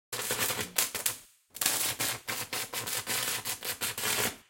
This a recoding of an arc welding machine being used to make electric sparks.
Gear used: Neumann KU100 dummy head, Schoeps CMC 5U MK8, Schoeps miniCMIT, Zaxcom Maxx